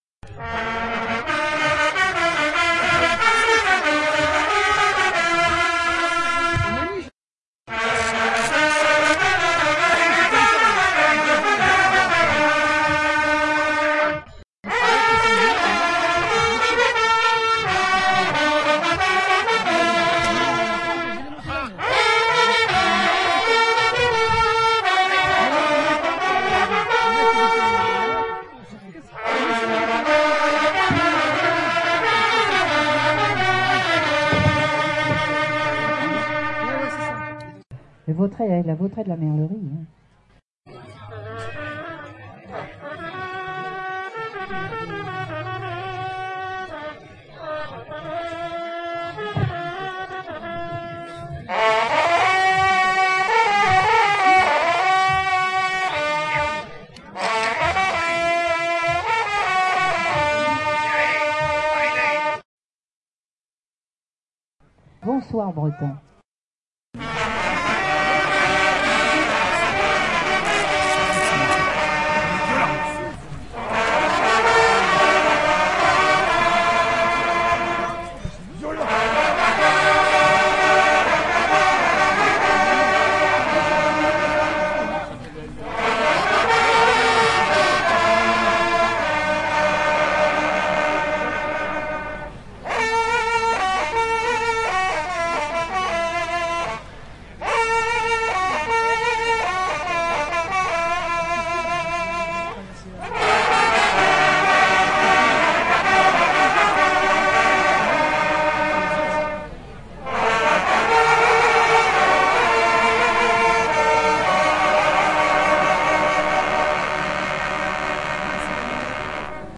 hunting horn players team registered at a hunting horn contest in Montgivray (France)
traditions, france, hunting, horn